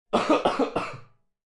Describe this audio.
Person Coughing 1 1
Voice, Flu, Coughing, Person, Sneeze, People, Sick, Human, Cough, Foley, Sound, Cold, Recording